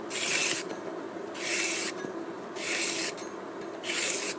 schillen winterpeen
Peeling a carrot on a synthetic cutting board. Recorded with an iPhone 6.
carrot, kitchen